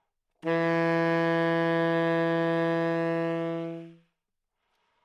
Sax Tenor - E3
Part of the Good-sounds dataset of monophonic instrumental sounds.
instrument::sax_tenor
note::E
octave::3
midi note::40
good-sounds-id::5006
neumann-U87, tenor, single-note, multisample, sax, E3, good-sounds